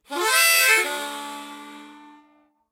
Harmonica recorded in mono with my AKG C214 on my stair case for that oakey timbre.
g, harmonica, key